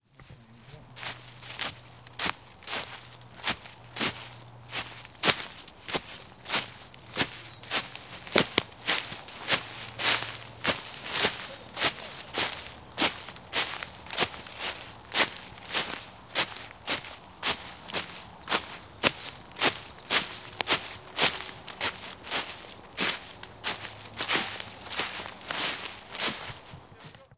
Footsteps in forest close
Recorded using a Zoom H4N the sound of boots walking through a dry wooded area with dry leaves underfoot. even and mid paced
boots, close, footsteps, leaves, walk